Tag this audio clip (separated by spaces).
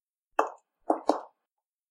footsteps heel walk